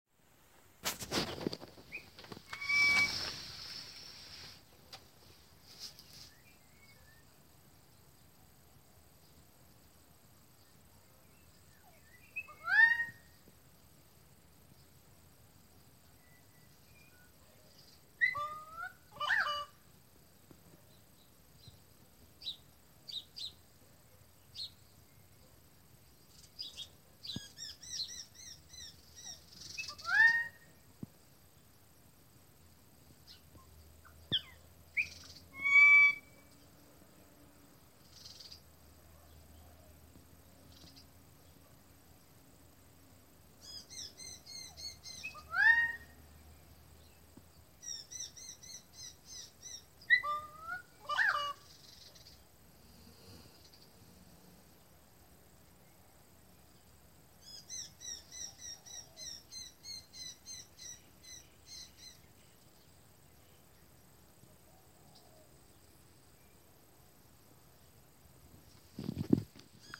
A mystery bird outside my window, I'd like someone to identify it.
Bird, abcopen, Australia, Morning